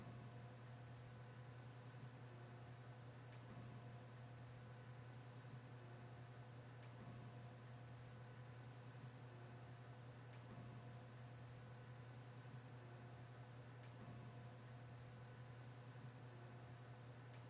Elevator Hum 2
Going up? Don't let the elevator's interior hum lull you to sleep!
(Recorded using a Zoom H1 recorder, mixed in Cakewalk by Bandlab)